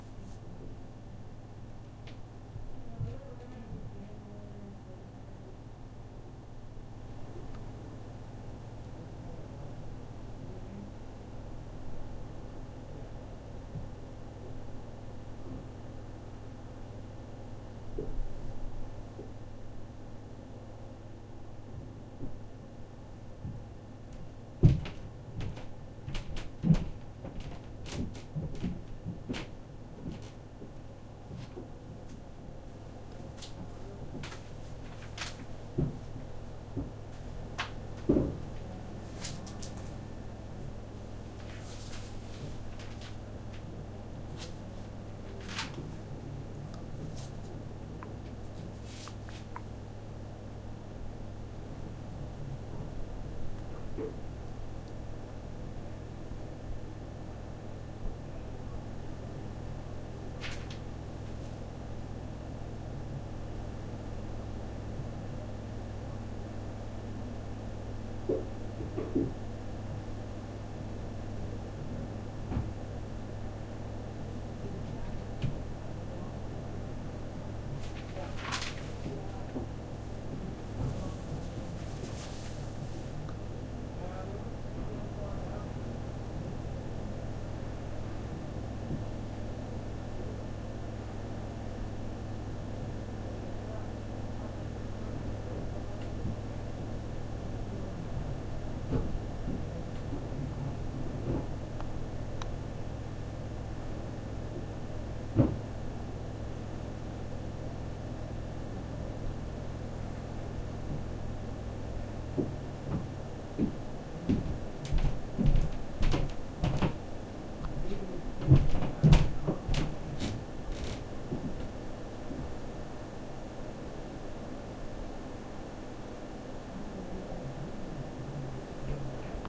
Indoor ambience at my house
homemade, indoor, field-recording, ambience